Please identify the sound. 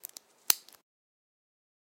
Watch Clasp Snap Closed
The sound of my Binger watch clasp being closed around my wrist.
Recorded with a Blue Yeti Pro microphone.
Watch, Watch-close, Watch-snap, Watch-snap-closed, Watch-sounds, Wristwatch